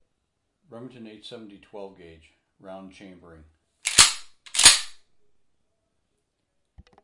Chambering a round in a 12 gauge shotgun